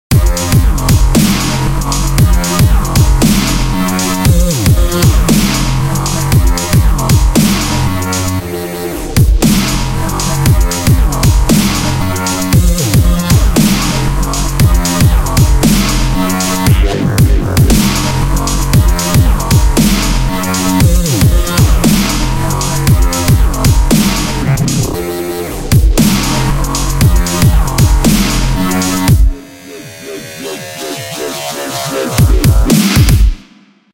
Likrakai Template 01
Here's a few loops from my newest track Likrakai! It will get filthier and filthier....i promise ;)